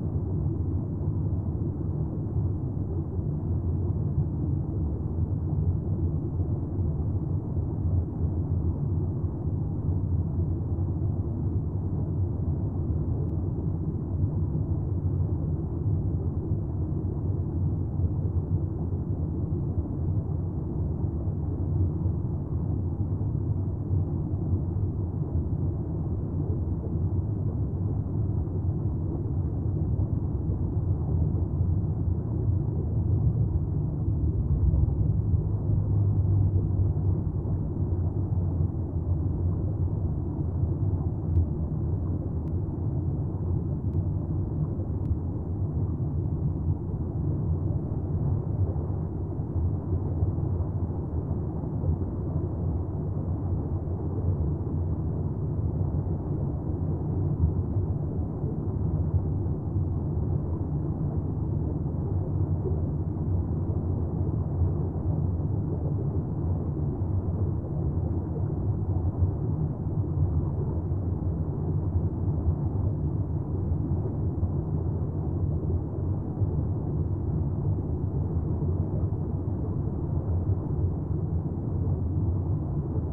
and sound editing.
ambience, ambient, scooba, soundscape, synthetic, underwater, water